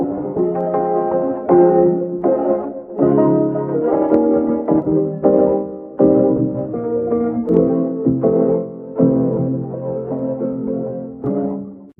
lofi vocoder thing
Guitar and some other things which I don't remember being sent through a vocoder